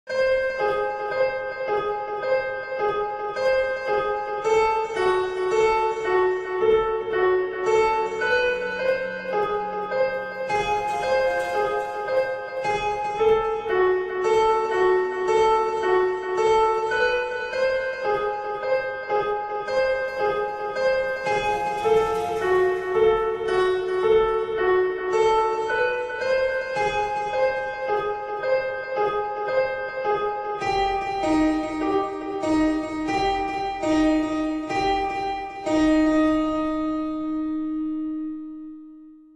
Spooky piano tune
Music I made in GarageBand for something called Victors Crypt. Use it to what you want.
I think this would be perfect for horror, creepy, scary stuff. Hope you like it.
fear, atmos, evil, phantom, frightful, film, terror, hell, bogey, haunted, scary, spooky, piano, suspense, delay, nightmare, ghost, sinister, Gothic, macabre, background-sound, terrifying, drama, dramatic, creepy, fearful, anxious, weird, horror, thrill